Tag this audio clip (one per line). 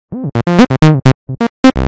roland
acid
house
propellerhead
tb-303
loop
reason
303